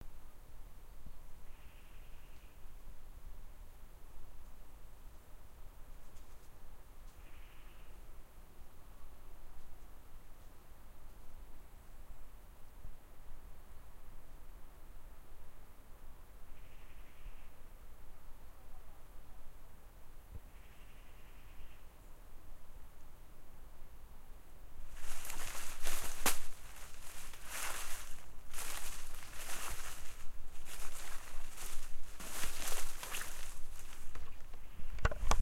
atmosphere autumn forest
atmosphere ,autumn ,forest